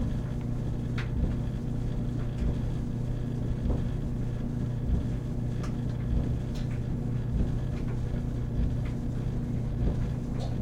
The basic sound of a clothes dryer tumbling, mostly the deep rumbling of the motor and the thump of the tumbler. There is some sound of the clicking of clothes within the dryer, but not much.
Recorded on a Sony PCM-M10 recorder with a very old Electret Condenser Microphone.
Motor, Appliance